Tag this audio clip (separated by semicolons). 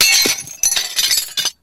break-glass; shatter-glass; shatter; glass-shatter; Glass-break